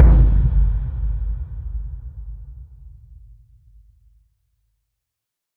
COPLAND DRUM 01
I wanted a drum which was like the huge drums used in "Fanfare For The Common Man" by Aaron Copland (not the rocky ELP version from the seventies). This is a floor tom slowed down with reverb from an AKG BX20e and some compression.
surdo, copland, timpani, fanfare, man, taiko, common, cinematic, drum, miles